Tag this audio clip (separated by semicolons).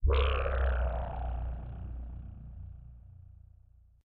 creepy; horror; low; scary; sinister; sting; synth; thrill